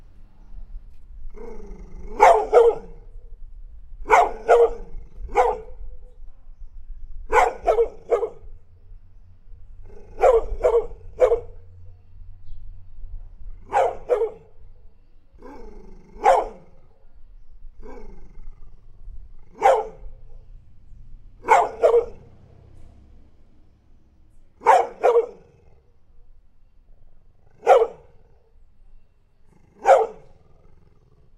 Cão latindo (forte)
Cachorro latindo (forte).
barking, cachorro, latido